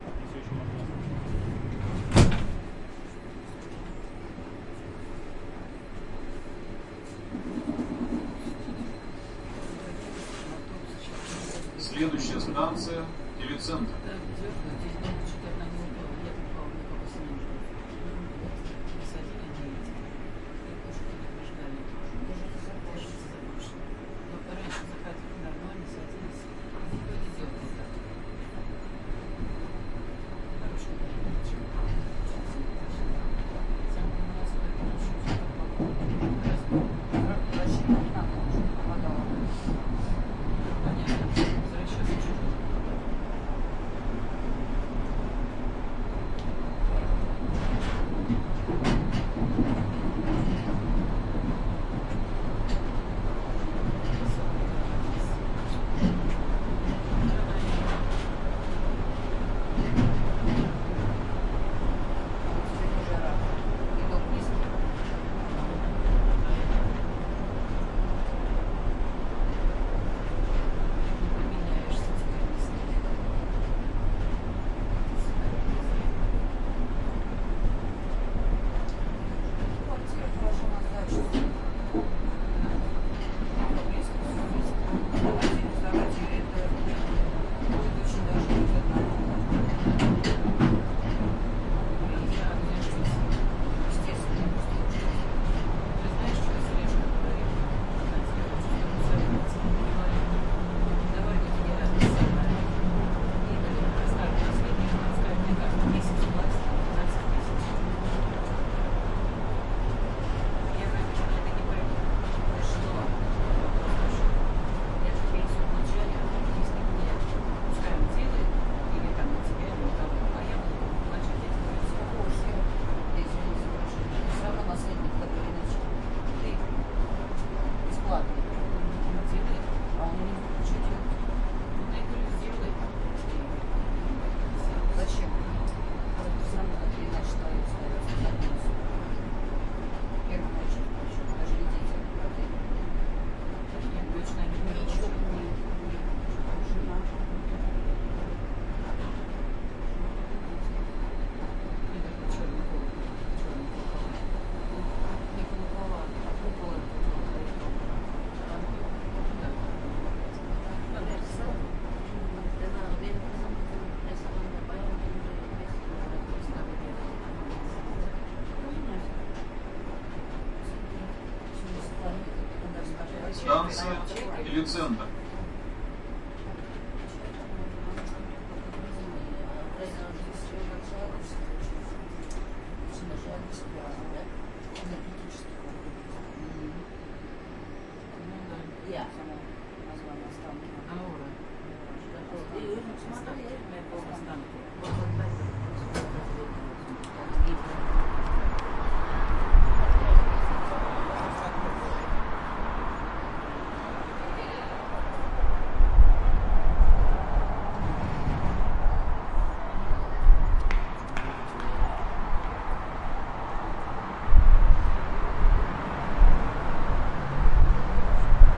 Moscow monorail Interior
transport, Moscow, monorail, ambience